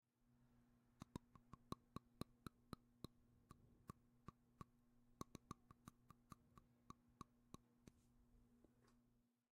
Human or monster teeth clicking.